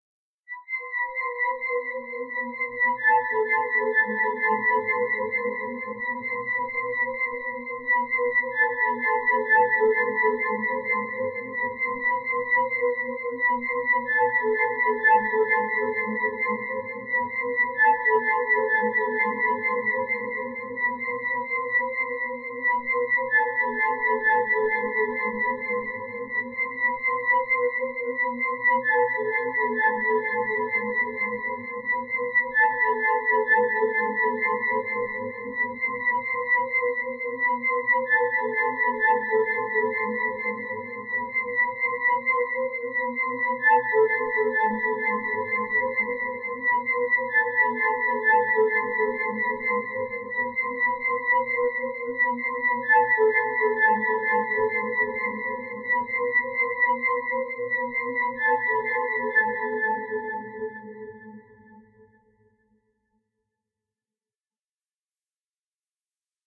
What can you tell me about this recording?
OK, some un-nerving suspense music for your creative productions, hope you enjoy :)
Created with free VST plugin Fury800 High Strings
sound, horror, drama, anxious, creepy, terrifying, thrill, music, erie, macabre, spooky, terror, Gothic, phantom, suspense, weird, scary, backing, haunted